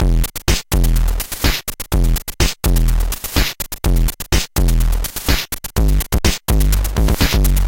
Atari ST Beat 05
Beats recorded from the Atari ST
Drum, Atari, Electronic, Beats, Chiptune